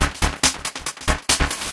A processed analog drum loop made with white noise
140 bpm